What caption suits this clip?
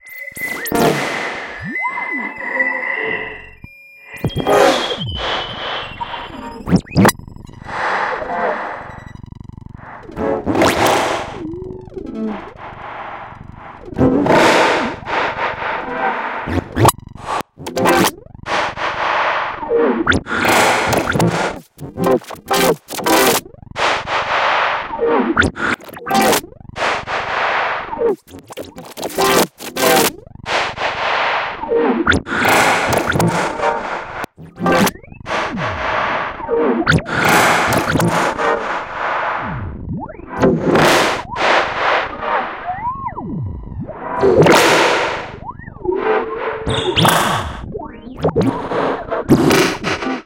starObject Resosplorv
Careless asteroid whispers in the dark (of space).
experimental, space, sfx, synth, resonant, sound-effect, sound-design, abstract, atonal, effect, sci-fi, fx